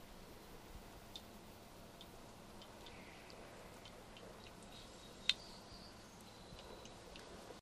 Monophonic goldfish activity recorded with DS-40 and edited in Wavosaur.